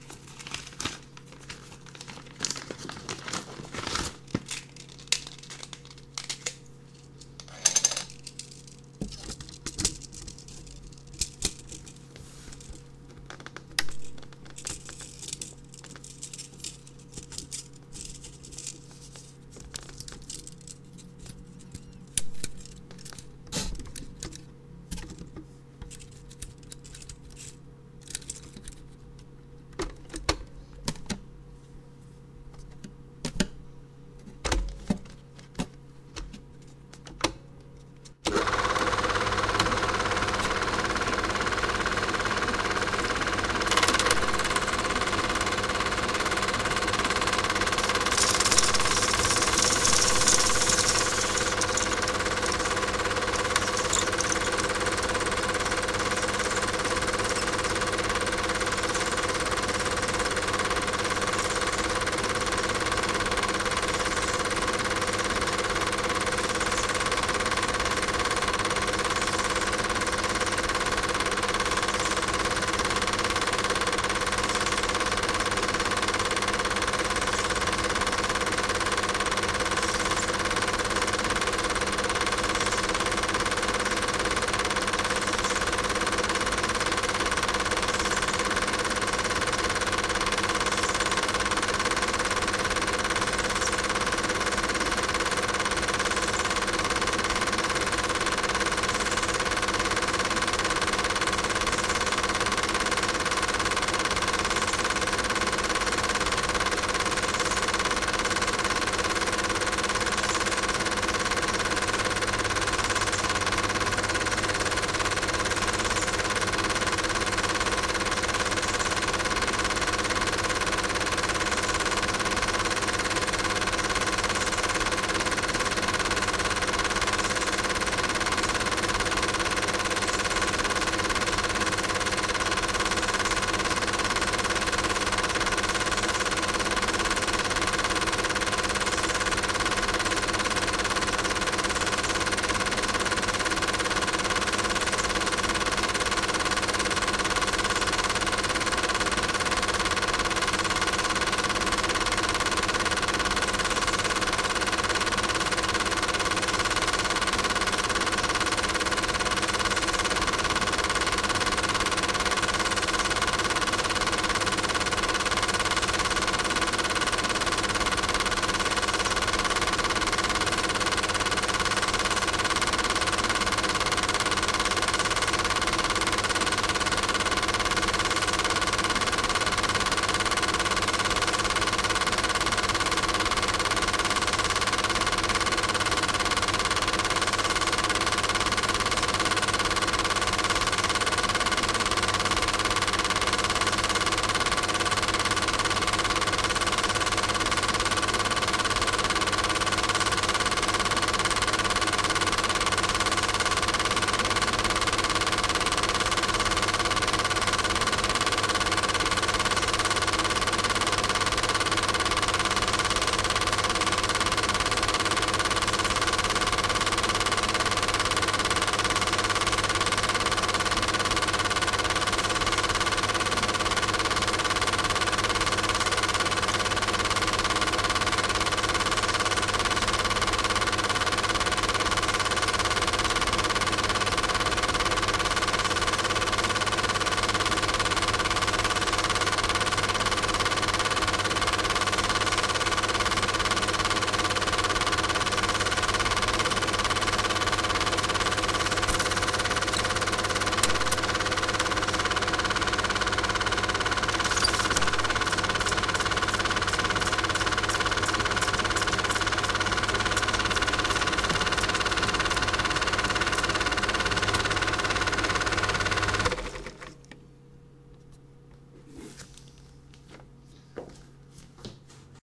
insert of a super 8 tape into a eumig 610 projector. Start and complete run until the end of the tape.
8, 610d, spinning, projector, tape, eumig, super, reel